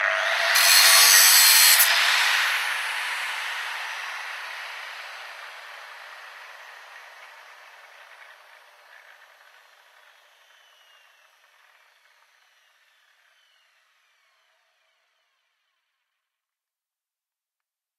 Hilti angle grinder 230mm (electric) running once cutting steel and slowing down.